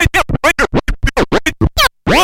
Scratching a funky vocal phrase. Technics SL1210 MkII. Recorded with M-Audio MicroTrack2496.
you can support me by sending me some money:
battle, chop, cut, cutting, dj, hiphop, phrase, record, riff, scratch, scratching, stab, turntablism, vinyl, vocal